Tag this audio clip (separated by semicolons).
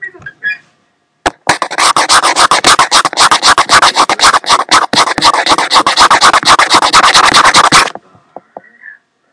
crazy; insane; wierd